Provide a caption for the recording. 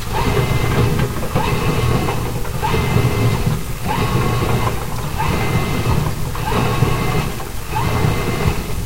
Washer Wash (loop)
This is the sound of a fairly new washer going through a wash cycle. Ambient shaping of the sound makes it apparent the washer is in a small room.
Recorded on Fostex MR8 8-track recorder with Nady SP-9 Dynamic Mic.
wash-cycle, clothes, washing-machine